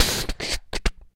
the break kit3
dare-19, beatbox, break